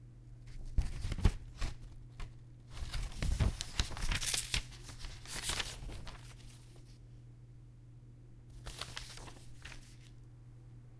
Paper turning
Turning over sheets of paper as though they were being read. I didn't actually read them because I was busy recording.
office, office-supplies, paper-turning, sheets, supplies